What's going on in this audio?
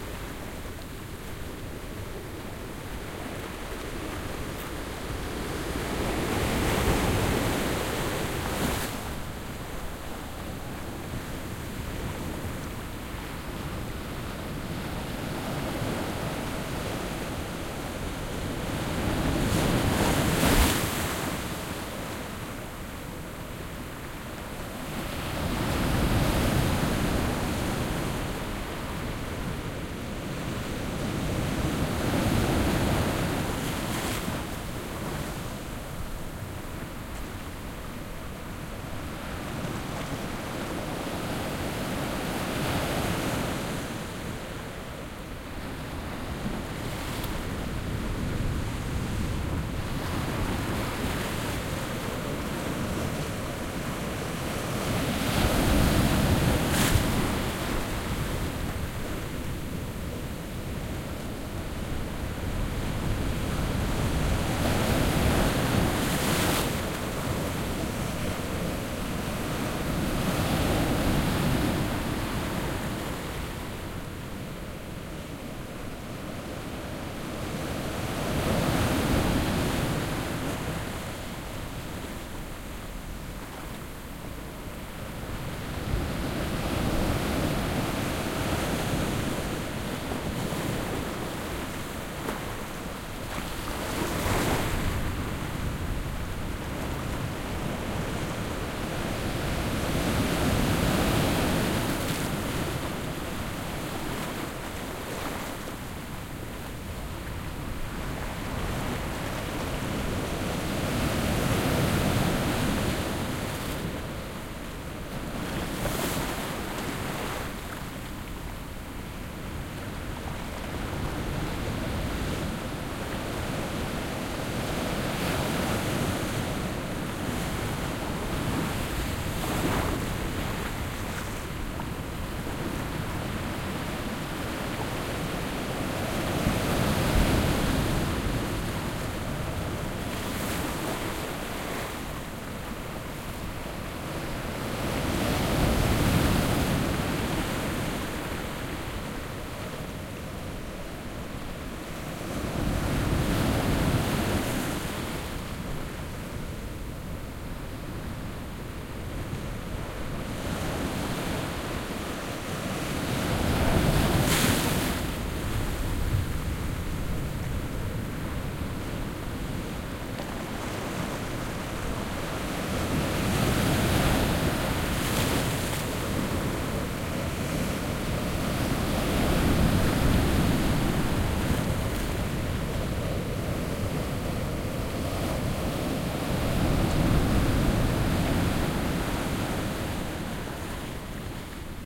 Quiet day, close recording of the breaking waves.